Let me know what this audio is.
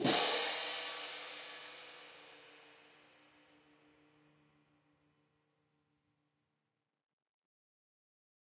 Marching Hand Cymbal Pair Volume 11
This sample is part of a multi-velocity pack recording of a pair of marching hand cymbals clashed together.
crash, percussion, band, cymbals, orchestral, marching, symphonic